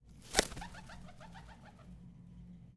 pigeon take off
Mono recording of a pigeon taking off. Recorded with DPA-4017 -> Sonosax SX-R4.
pigeon, pigeon-take-off, sonosax-sx-r4